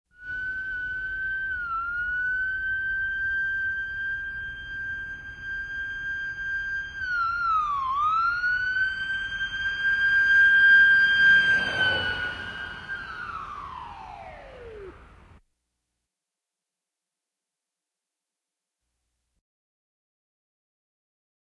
Emergency Ambulance Pass
An ambulance siren passing from left to right.
Ambulance, Alarm, Siren, Emergency